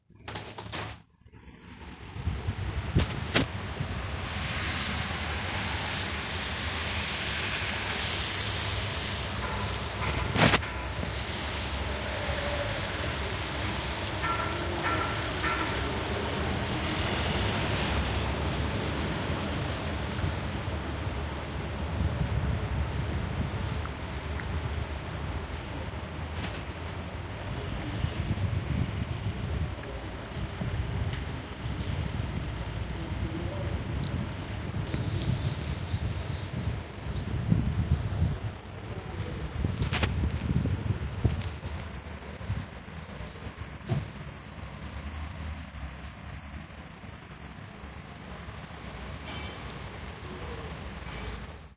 Windy day with snow and occassional bird chirping, opening the windows and recording looking out from the window with sounds from the parking lot and a construction site nearby.
Recorded by Huawei Prime phone whch unfortunately made some sound crop itself in the last part. Recording date: 04.02.2019
sound, chat, corporate, construction-workers, construction, birds, chirp, noise, workers, winter, snow, kitchen, hum, parking-lot, water, wind, talking, field-recording, people, february, cars, ambience, snowy, construction-site, street, background, window, chirping